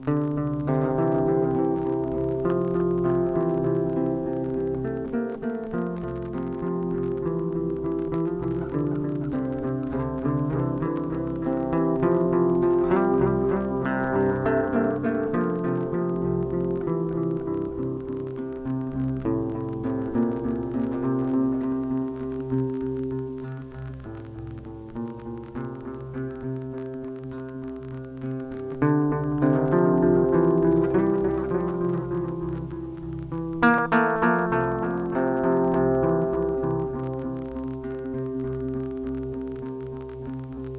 Dminor1 (100bpm)
A loopable Dminor scale played in an alternative tuning. 100 beats pr. minute.
Guitar: Washburn Festival series.
Recorded into Ableton.
iceland, guitar, ethnic, raw, guitarholica, larus, loopable, minor, gudmundsson, spanish, loop